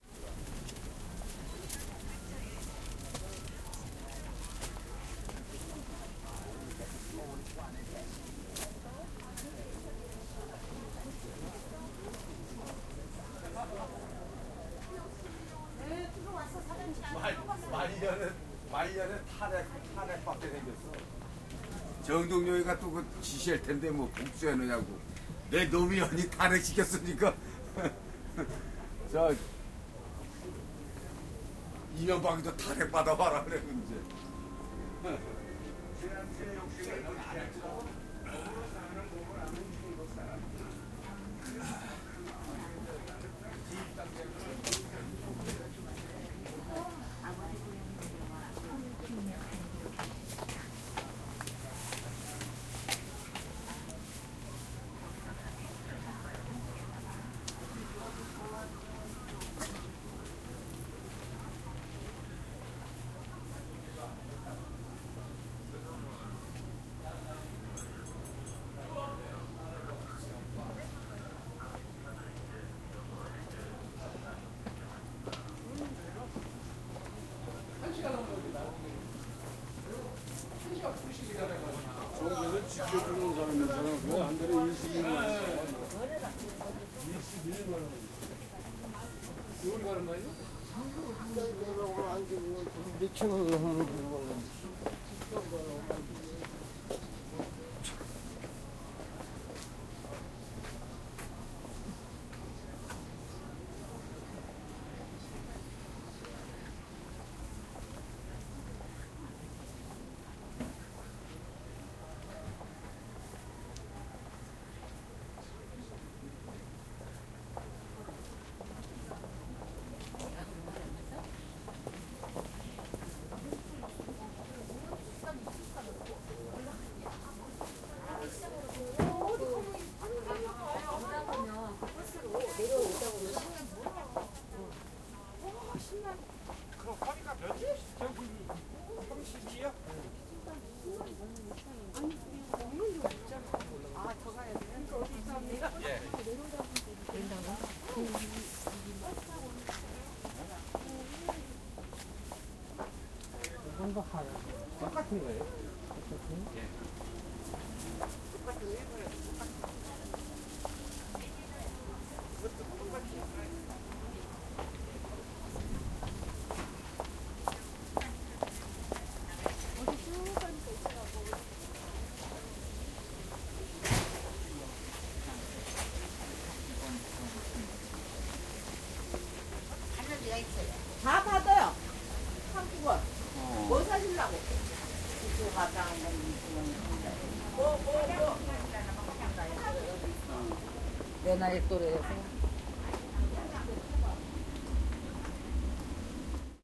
0186 Yeongdeungpo Market
Market. People talking in Korean, walking and doing things.
20120215
field-recording, voice, seoul, steps, korean, korea, water